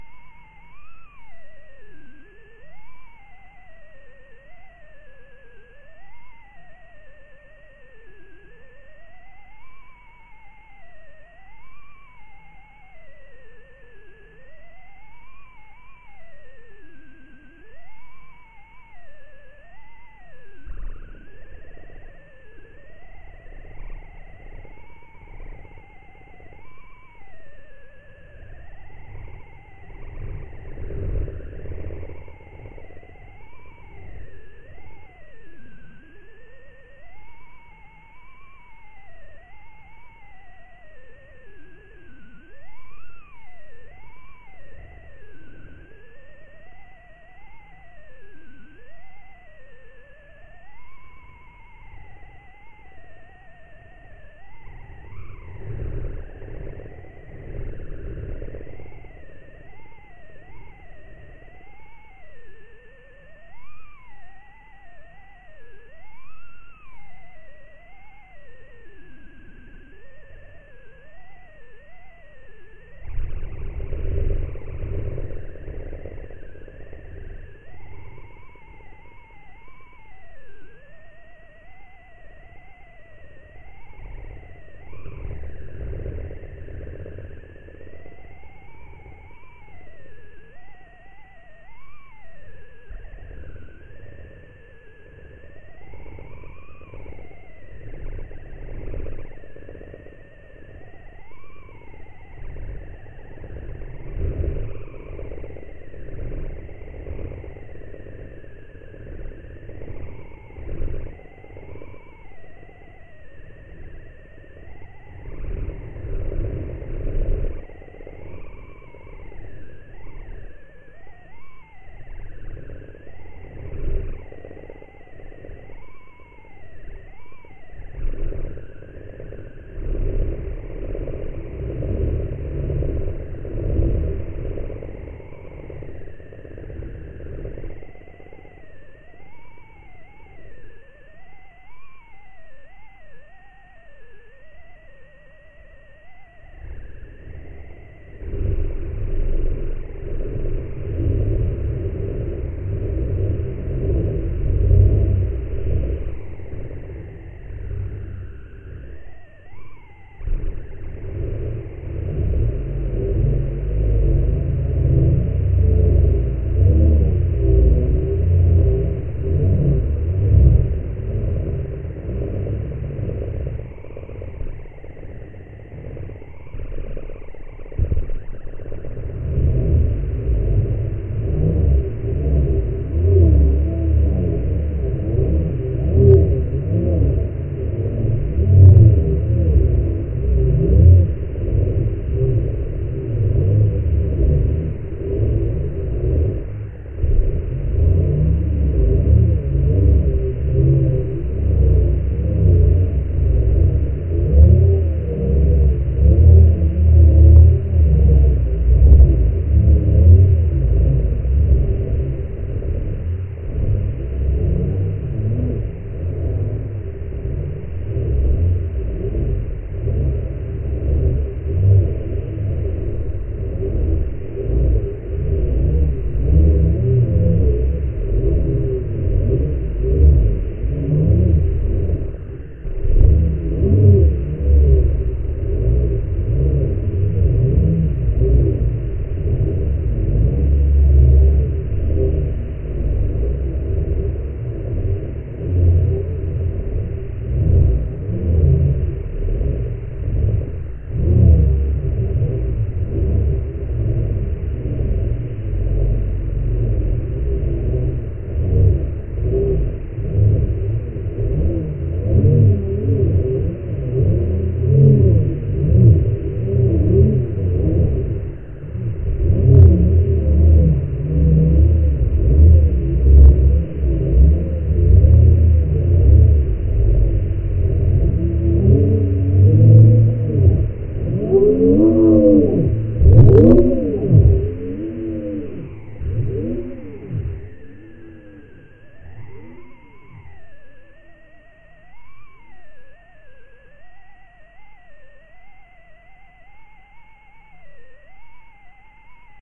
unkonwn transmission2
analog, comms, communication, digital, distorted, distortion, electronic, field-recording, garbled, government, military, morse, noise, radar, radio, receiver, signal, soundscape, static, telecommunication, telegraph, transmission, transmitter